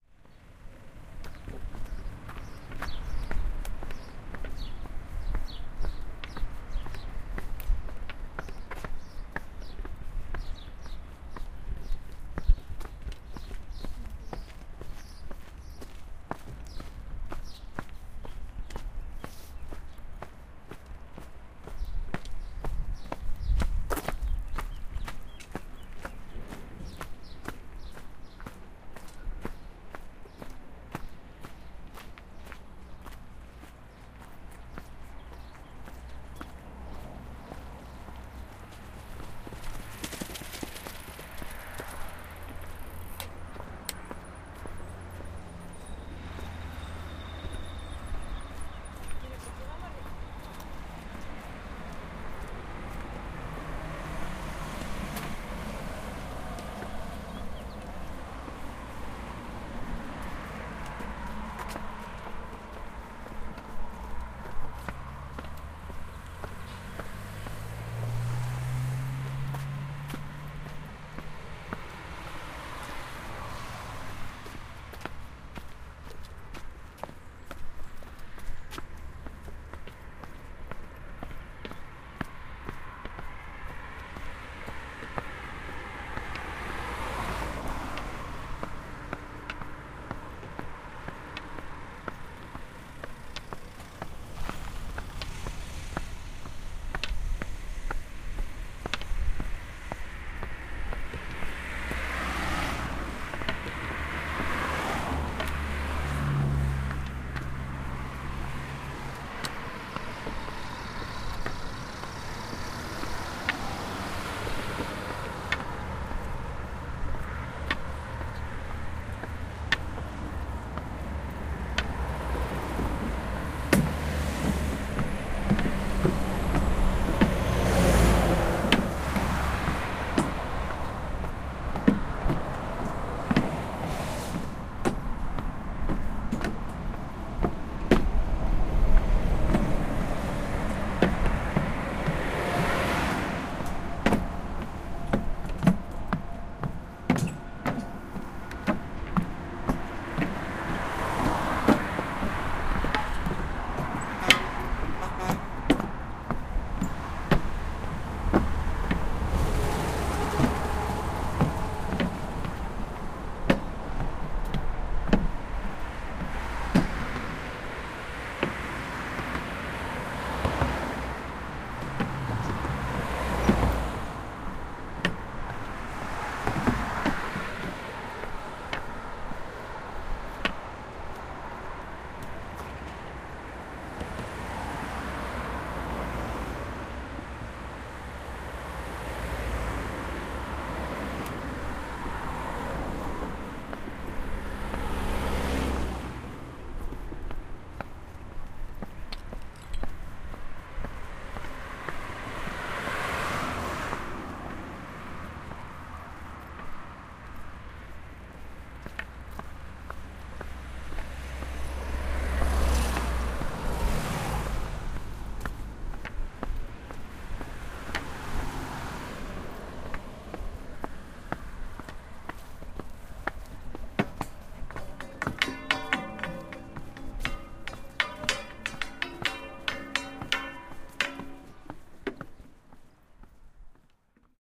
3/8/2011 - Fifth day
This recording and the previous one (Walking to Santiago) mainly capture our footsteps in two different points in the way from Pedrouzo to Santiago. What is interesting is to hear to the difference between them.
While in the first part we are walking through a rural ambient, as we are getting closer to Santiago we begin to hear more and more cars and more signs of "industrial civilization".
Listening to the arrival recording you can imagine the somehow disappointing image of entering to Santiago de Compostela between roads and highways.
The recording was made with a Zoom H4n.